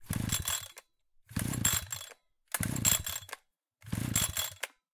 Chainsaw start. Recorded with zoom h4n.